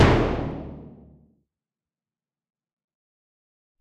A clean HQ Timpani with nothing special. Not tuned. Have fun!!
No. 21
dry, pauke, percussive, drums, orchestra, timp, percs, timpani